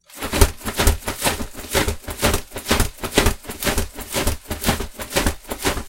Bat Wings (Slow)
Used my umbrella to create this sound effect. Recorded with my Samson C03U microphone.
flapping, flying, bird